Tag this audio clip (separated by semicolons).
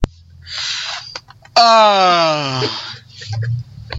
foley; sigh